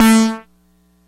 multi sample bass using bubblesound oscillator and dr octature filter with midi note name
sample, bass, multi